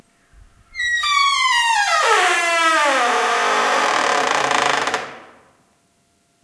Wooden Door Squeaking Opened Slowly 2
Series of squeaky doors. Some in a big room, some in a smaller room. Some are a bit hissy, sorry.
opening, squeeky, screech, shrill, gate, wooden, slide, cacophonous, squeak, squeek, open, closing, portal, close